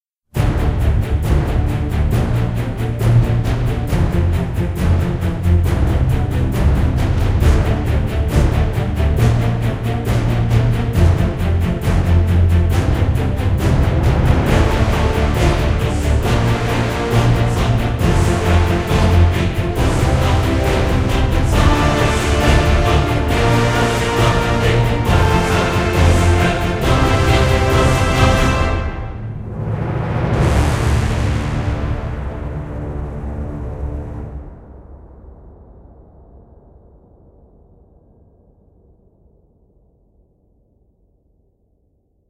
Epic trailer action music 2
Music to make an epic, powerful and action-packed short cinematic movie trailer, music inspired by the style of Two Steps From Hell and Jo Blakenburg.
This music has a simple chord progression that is widely used in epic and trailer music, which gives a lot of excitement to the scene.
The musical piece was made in my DAW StudioOne with orchestral libraries: AudioImperia Nucleus and Metropolis Ark.
cinematic, percussion, brass, movie, powerful, booming, music, dynamic, soundtrack, film, heroic, horns, Hollywood, majestic, strings, trailer, action, epic, choir, sountracks